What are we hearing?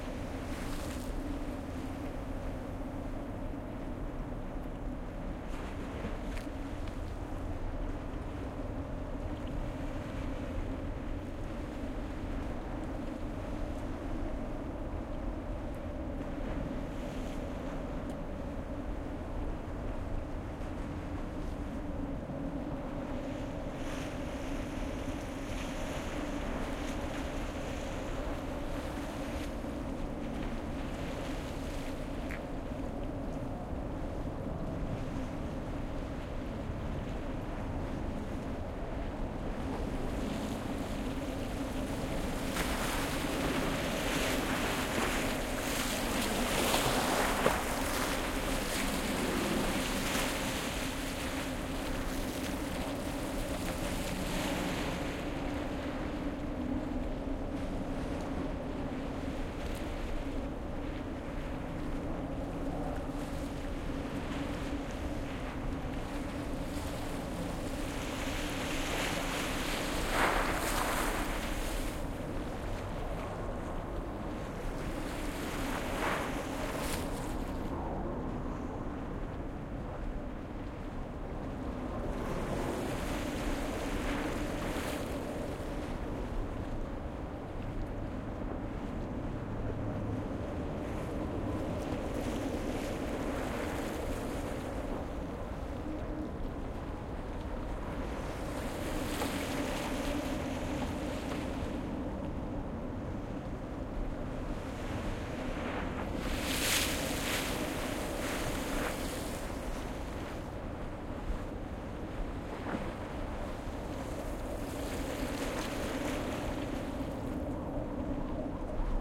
City River - Ambience (night) | Stereo MS
atmosphere, background-sound, noise, river, urban, ms, waves, atmo, stereo, atmos, ambient, field-recording, general-noise, ambiance, bit, ambience, night, city, soundscape, 24, dogs, background